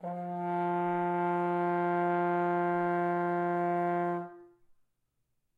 horn tone F3
A sustained F3 played at a medium volume on the horn. May be useful to build background chords. Recorded with a Zoom h4n placed about a metre behind the bell.
f, f3, french-horn, horn, note, tone